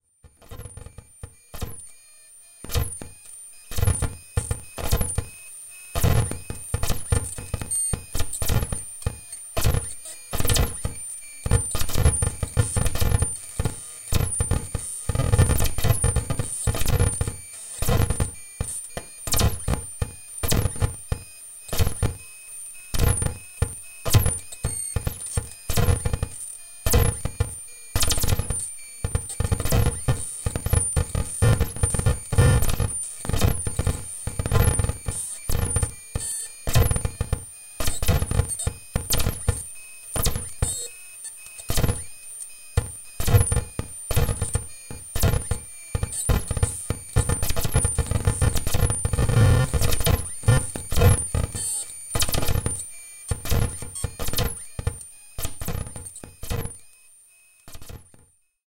Blips and beeps made on an Alesis micron